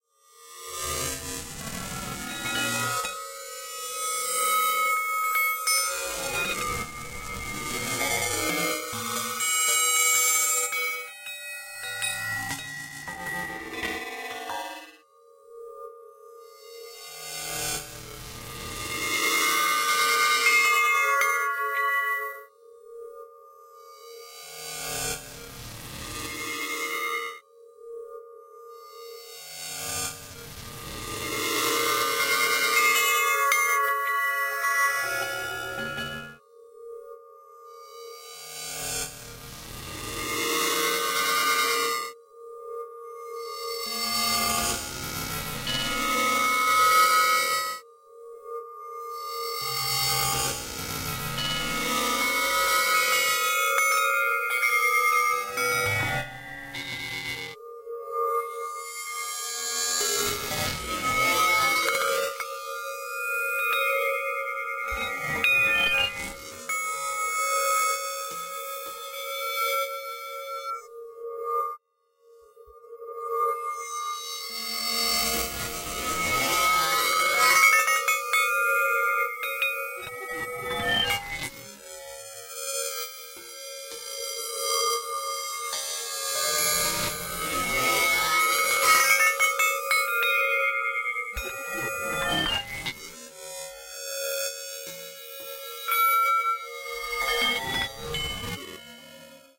Hells Bells - 03
Bells echoing in the dark recesses of a sticky ear cavern.
ambient, bells, chimes, dark, discordant, dissonant, distorted, grain, granular, hells